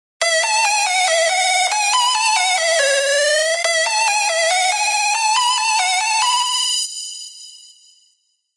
Modulated Lead E Major 140 BPM
A modulated lead in E Major at 140 BPM suitable for styles such as Bounce/ Scouse-House/ Hardcore/ Hardstylz created using modern digital synthesisers and processors.